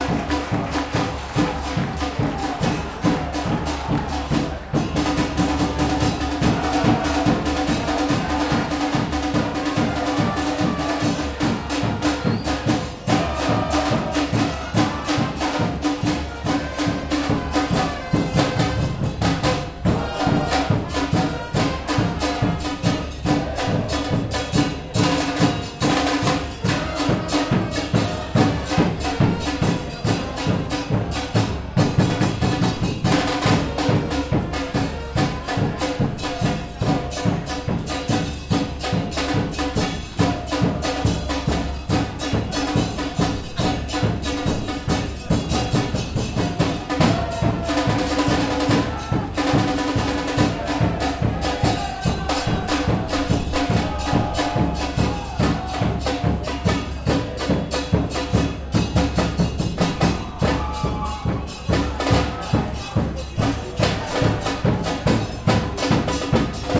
TRATADA190127 0792 organizada tascam stereo 2
Radio Talk - Stadium - Recording - Soccer - Ambience